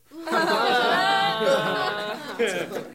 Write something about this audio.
Small crowd laughing at a "dad joke."